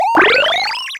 Retro video game sfx - Powerup
A video game power-up sound. Generated using Labchirp.
8-bit, arcade, atari, bfxr, chip, chipsound, game, labchirp, lo-fi, retro, video-game